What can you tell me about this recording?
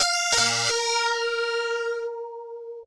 Live Dry Oddigy Guitar 13 OS
live, grit, distorted, guitars, bitcrush, free, bass